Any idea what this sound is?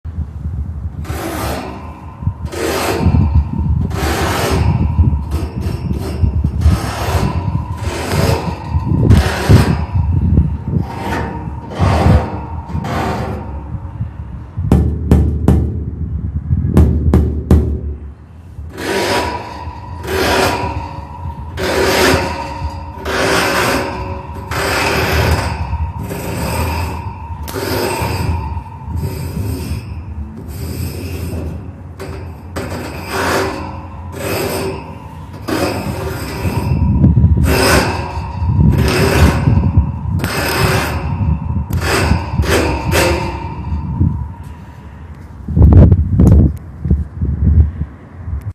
metal sounds2
scratching metal fence
scratch, metal, sounds